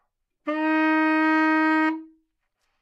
Part of the Good-sounds dataset of monophonic instrumental sounds.
instrument::sax_baritone
note::D#
octave::3
midi note::39
good-sounds-id::5266